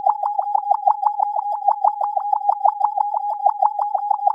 nice ovni
It's an all synthetized sound created on audacity.I wanted to recreat the sound of an ovni, but not one that makes people scared : like there is only one alien in this OVNI but it seems pretty nice.
I started by created a new audiotrack, then I generated Chirps and keeping the default values. Then I duplicated the track and made a stereo track of both.
I add WahWah effect on the track, changing and modulate the different default values (for example, highest dept and a lot of resonance).
After, I add phaser affect on the whole track to make the sound a little bit more spacial. Then I normalized the track.
Last, I raised the speed twice by 2.
Then I Saved and export my sound.
D'après moi c'est un son répété formant un groupe tonique, au timbre harmonique acide,au grain lisse et dynamique.
ovni, alien, ufo, spaceship, sci-fi, space